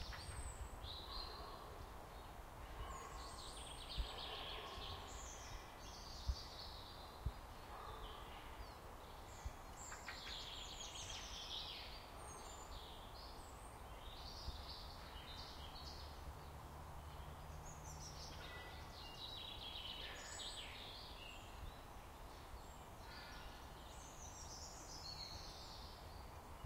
Some birds in a wooden suburban village near Moscow.
village, suburban, Moscow, birds